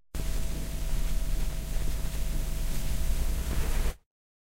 The sound a vinyl record player makes as the needle seeks the start of a music track on a 12" vinyl disc I found it on a music file I've just discovered.